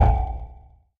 STAB 046 mastered 16 bit
Created with Metaphysical Function from Native
Instruments. Further edited using Cubase SX and mastered using Wavelab.
electronic, industrial, sonar